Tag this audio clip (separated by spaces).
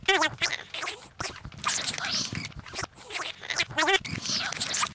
chipmunk discussion talk voice